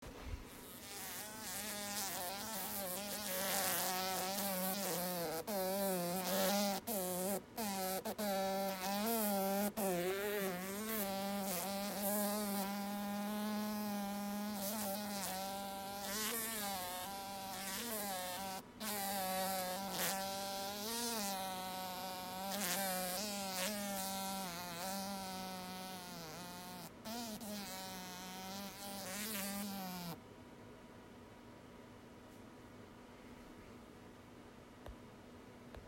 Fly Trapped
A blue bottle fly caught in a sticky trap trying to escape.
blue, bottle, buzz, buzzing, ear, fiel, field-recording, fly, insect, insects, nature, pest, sticky, stuck, trap, trapped